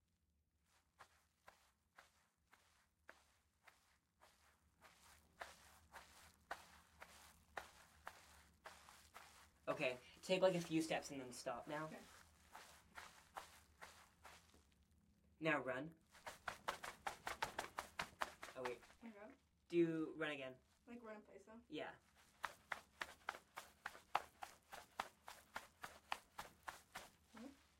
dirt footsteps
some quiet footsteps on dirt/grass.
foley, steps